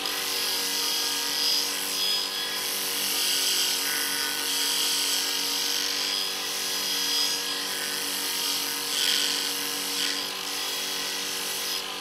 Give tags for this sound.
80bpm arboga belt-grinder crafts grinding machine steel tools work

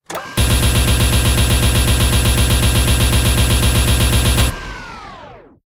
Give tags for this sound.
cannon fight gatling gun war

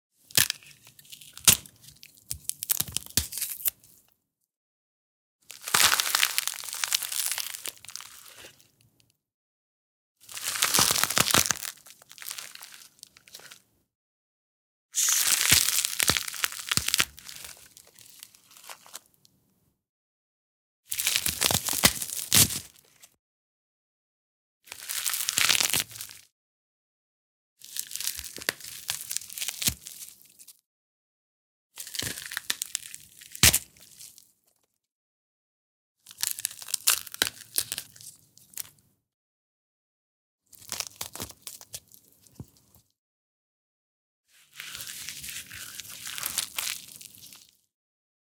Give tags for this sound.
vegetable tear tearing bones break frozen breaking ice foley

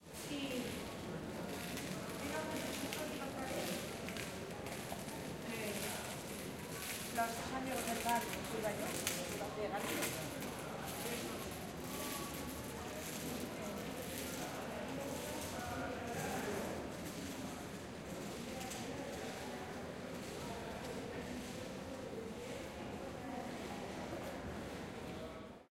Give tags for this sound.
people street handicap

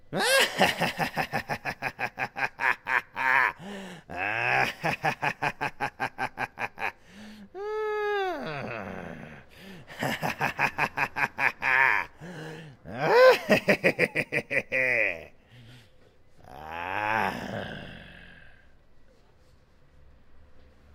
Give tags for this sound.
evil joker laughter

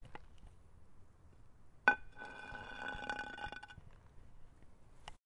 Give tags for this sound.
pole baseball dragging

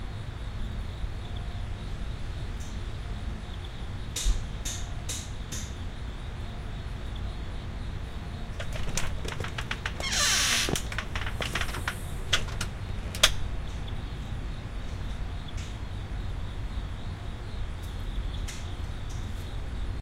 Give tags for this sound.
creak door metal outside slam steps stone

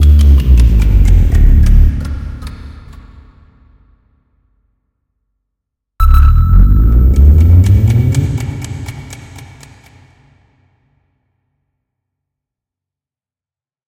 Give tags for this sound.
low reverb ticking timer time-stop

